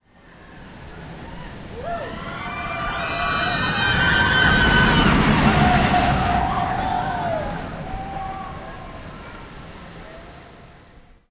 Roller Coaster Screams, A
A pass-by of screams from the roller coaster "Expedition Everest" at Disney's "Animal Kingdom" theme park. The passengers appear to be quite frightened. The ride has a waterfall on its side, which can be heard as a white noise hiss on the left.
An example of how you might credit is by putting this in the description/credits:
The sound was recorded using a "H1 Zoom recorder" on 9th August 2017.